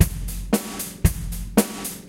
Inspired by the Billie Jean beat, and processed. Loopable. Gretsch Catalina Maple 22 kick, Premier Artist Maple snare.
Recorded using a SONY condenser mic and an iRiver H340.